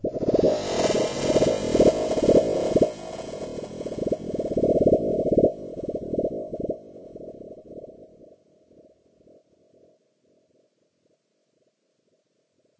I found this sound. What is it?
Artificial Simulated Space Sound 08
Artificial Simulated Space Sound
Created with Audacity by processing natural ambient sound recordings